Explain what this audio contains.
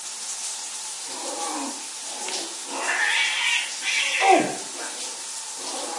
played in the bathroom with noise of my shower in background.
Sennheiser ME66+AKG CK94 in mid-side stereo configuration